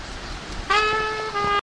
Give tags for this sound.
field-recording; monophonic; road-trip; summer; travel; vacation; washington-dc

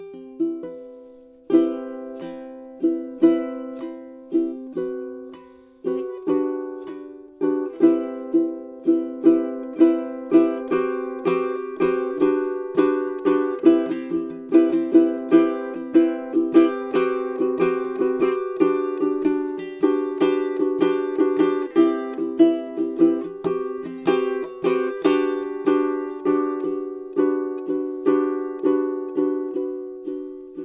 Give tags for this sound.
improv; instrument; sad; ukulele

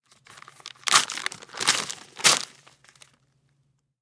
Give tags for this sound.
ripping; tearing-paper; paper; tear; tearing

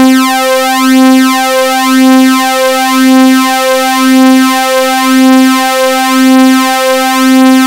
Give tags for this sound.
detune; reese; saw

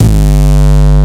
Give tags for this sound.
break; breakcore; breaks; broken; core; dark; distorted; gabba; gabber; house; kick; techno

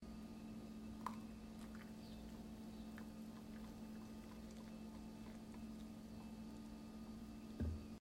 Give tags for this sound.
cup; drink; fill; hot; liquid; pour; pouring; water

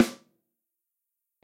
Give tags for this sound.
Breathing; samples; drum; snare

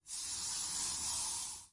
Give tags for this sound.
Air; Gas; Spray; Bottle

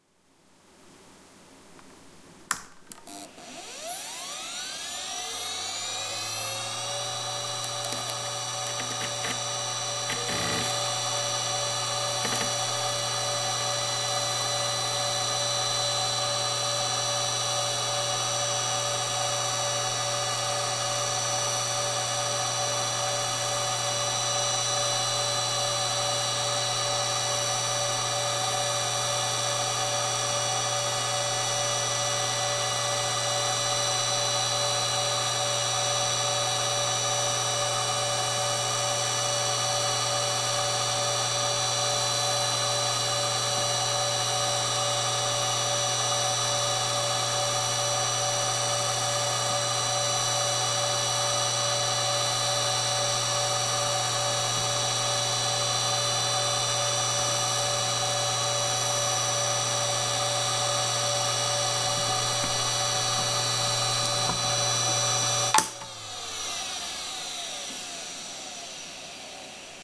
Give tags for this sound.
HDD; whirring; Sound; ide; hardware; fireball; Spin; 40gb; Up; Drive; quantum; Hard; Down

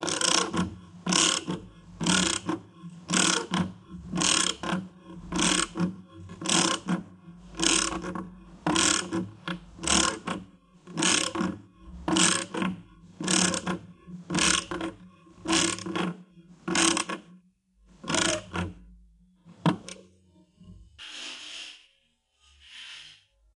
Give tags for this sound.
mechanical; metal; movie-sound; tools

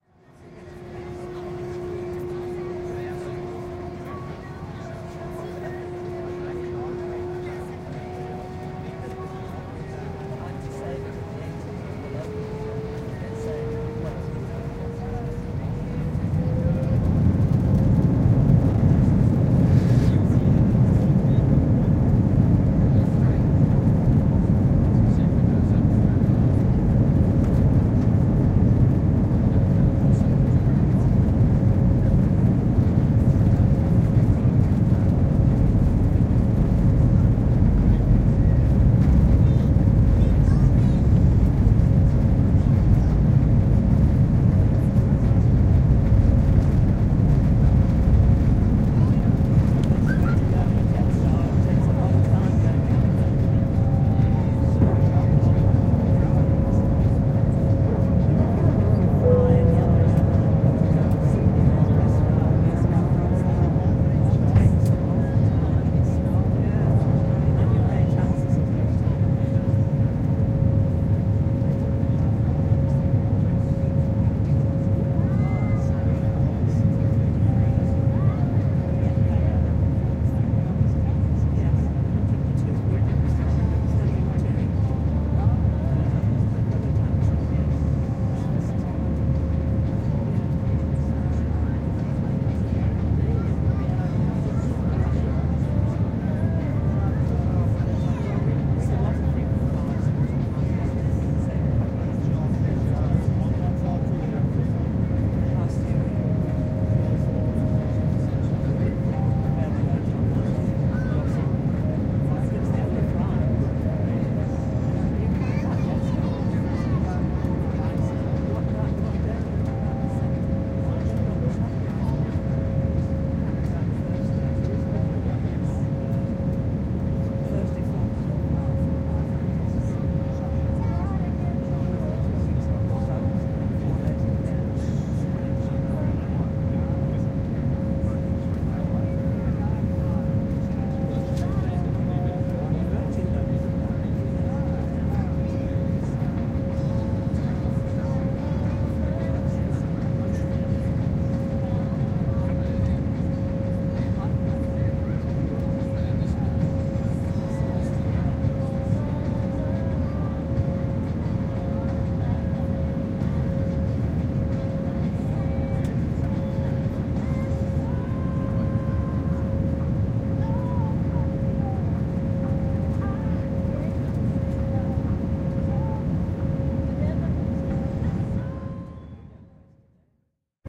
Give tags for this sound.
aeroplane; aircraft; airplane; field; jetstar; lift-off; plane; qantas; recording; take-off; up

